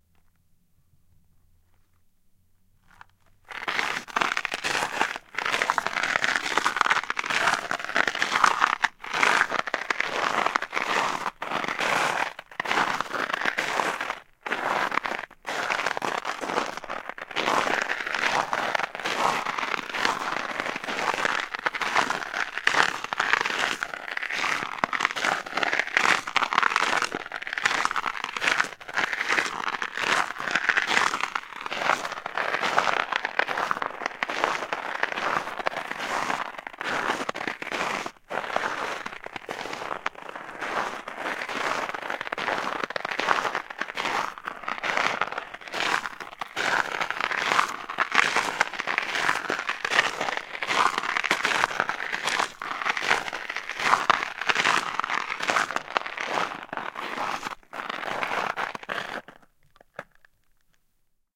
Walking On Frozen Snow, Handheld Mic
A stereo field-recording of footsteps on frozen snow (followed by mic) . Rode NT-4 > FEL battery pre-amp > Zoom H2 line in.
snow, stereo, field-recording, footsteps, xy, walking, handheld-mic, ice